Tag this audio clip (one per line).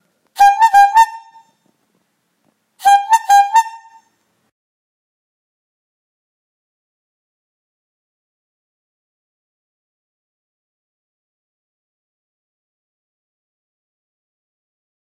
bocina
clown
fx
horn
payaso
wildtrack